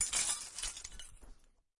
Broken glass shuffled and gathered on a felt mat. Close miked with Rode NT-5s in X-Y configuration. Trimmed, DC removed, and normalized to -6 dB.
broken, glass, noisy, shuffle